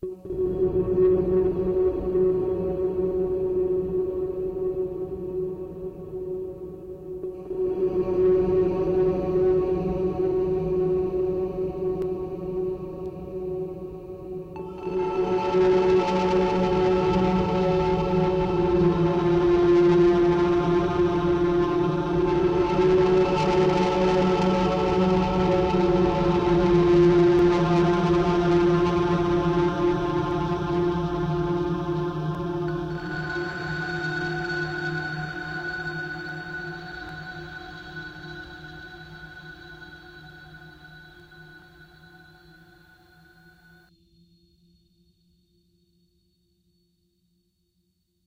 creepy sonar synths
Some creepy underwater / sonar(cave maybe?)sounding synths with heavy distortion and a rumbling bass layer. Excellent for horror movies (especially for startling moments of darkness or a sinking feeling) or dark ambient projects.
Done in ZynAddSubFx, mixed in Audacity. Sorry for the noise, my soundcard ain't exactly top shelf.
abyss, creepy, depth